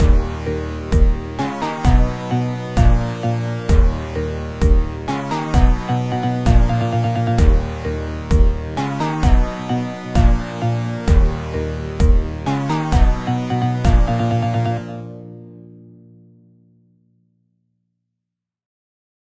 House track (intro)
This sound has the potential to be used as a great intro for a house track!
I created this using Logic Pro x 10 on an apple iMmac late 2013 27 inch model.
i made the loops from scratch myself, and the all of the sounds were sounds already installed in the DAW. I created this at home on my computer.
130-bpm, dance, music, house